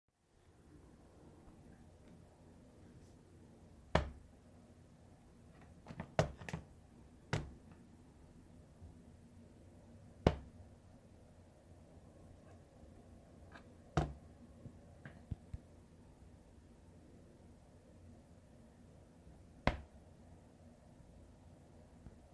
Macbook Closing
Shutting a MacBook Air (2016, 13").
Recorded with a Samsung Galaxy S8 using the "Voice Recorder" app from the Play Store.
Use whenever, wherever, don't worry about credit!